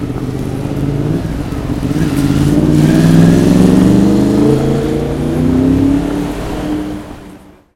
Just car passing by...
car, driving, automobile, city, drive, engine, road, motor, street, cars, field-recording, truck, auto, vehicle, passing, traffic